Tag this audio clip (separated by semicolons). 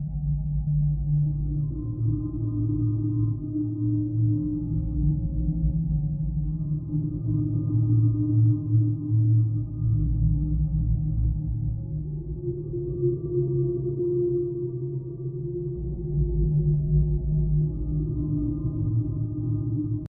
ANXIETY; ATMOSPHERE; HAUNTED; HAUNTING; HORROR; TERROR; THRILLER